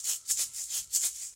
Shaker Percussion Home-made